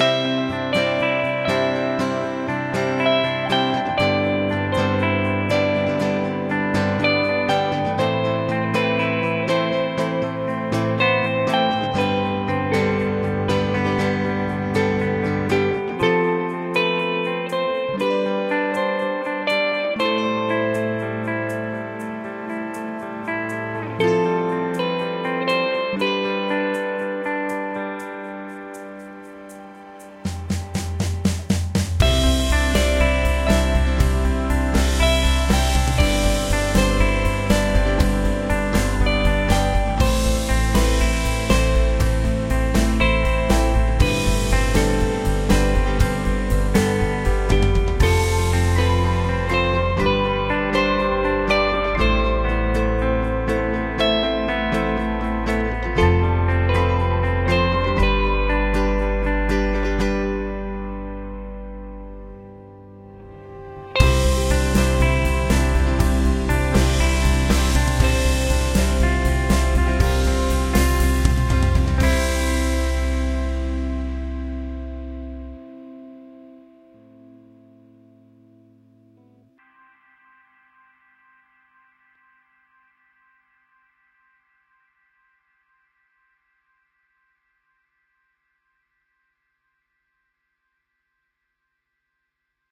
Inspiration Pop music
This is gentle, happy song. The instrument includes acoustic guitar, piano, bass, drum, string.
I created this music by Logic Pro X software.
acoustic, cinematic, happy, piano, pop, soundtrack